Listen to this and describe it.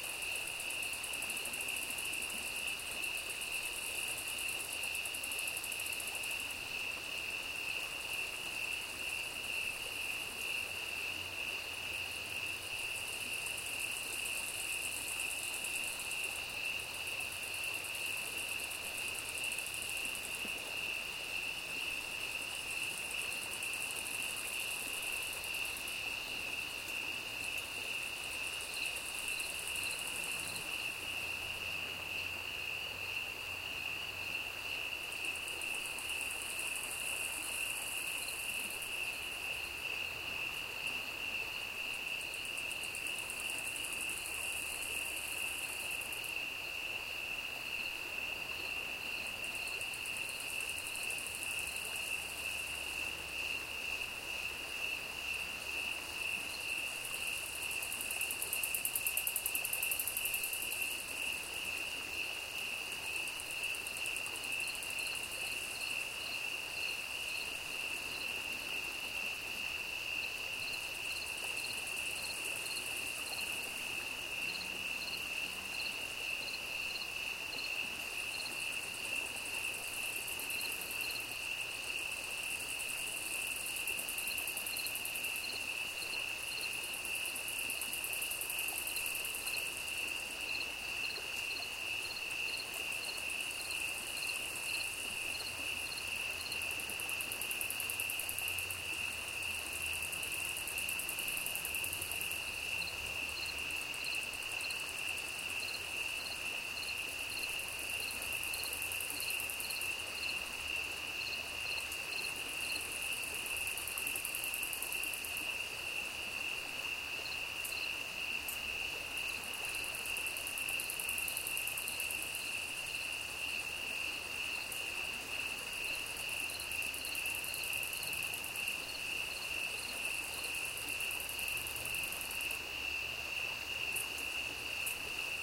Night Stream and Clicking Crickets
A soundscape comprised of night insects and a flowing stream.
ambiance, clicking, crickets, field-recording, insects, nature, night, soundscape, stream, summer, urban